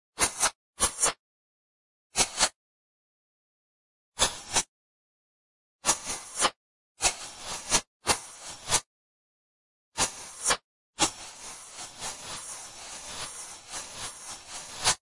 Heavily processed expanded multiple breath sounds. Increasing in length.